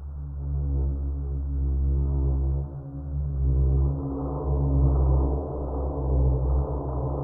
Result of using effects on a gong sample.